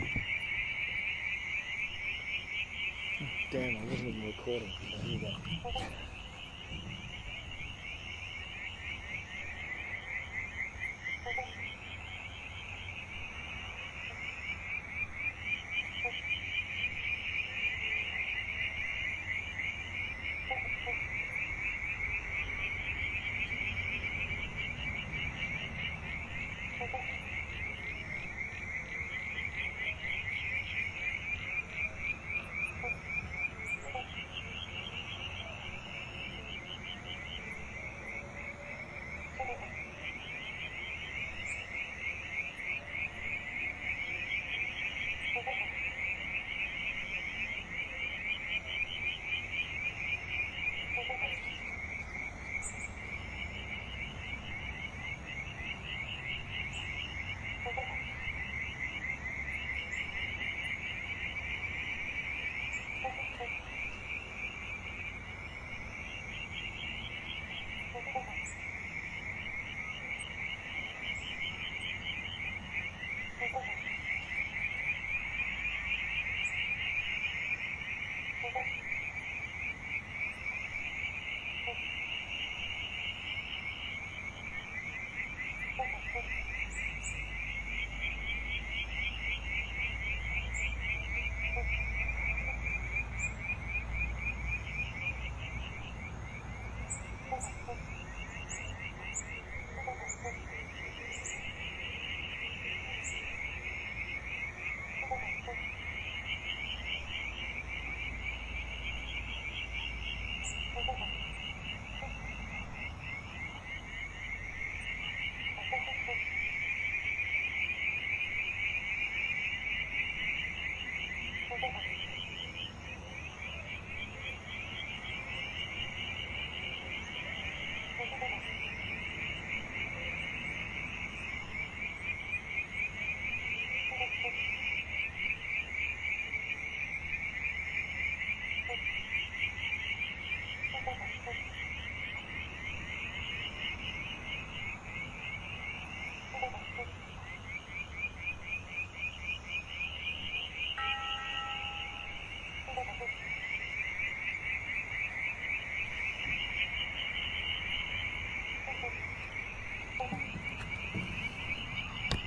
Wetlands Night
Recorded at night in a small man made wetlands in Cowes on Phillip Island. Some cars and sounds of home stereos in the background.
Amb, Ambience, Atmosphere, Cars, Frogs, Insects, Night, Summer, Wetlands